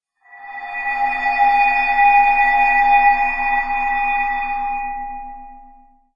a drone produced from heavily processed recording of a human voice